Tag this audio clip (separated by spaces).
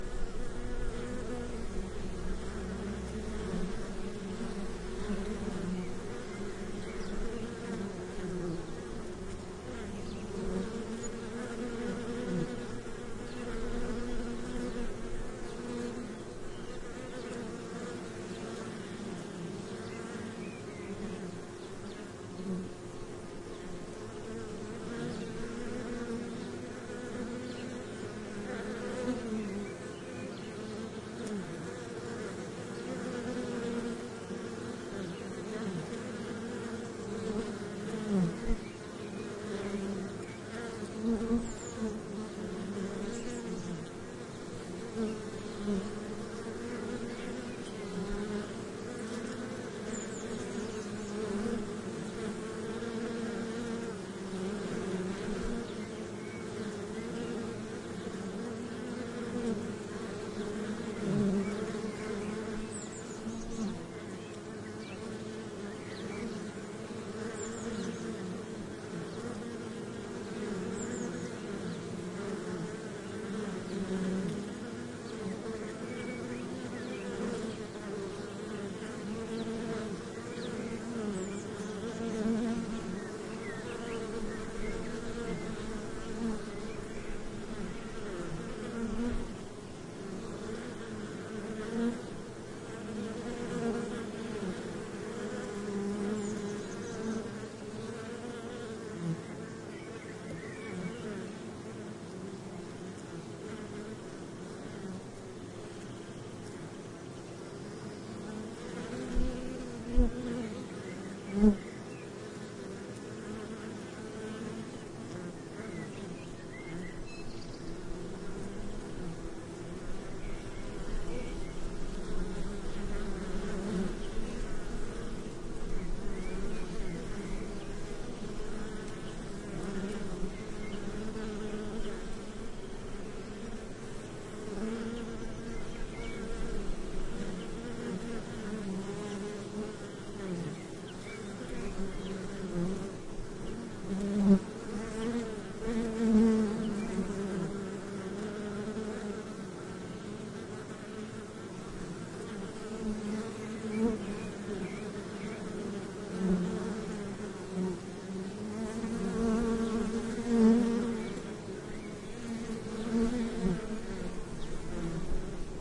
hive animals flying insects ambience swarm nature field-recording bee